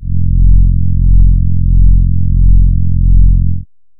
Warm Horn F1
An analog synth horn with a warm, friendly feel to it. This is the note F in the 1st octave. (Created with AudioSauna.)
synth, horn, warm, brass